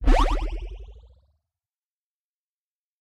Synth chiptune 8 bit ui interface item use health power up
chiptune, power, use, interface, 8, item, up, health, bit, Synth, ui